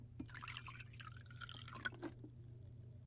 These are various subtle drink mixing sounds including bottle clinking, swirling a drink, pouring a drink into a whiskey glass, ice cubes dropping into a glass. AT MKE 600 into a Zoom H6n. No edits, EQ, compression etc. There is some low-mid industrial noise somewhere around 300hz. Purists might want to high-pass that out.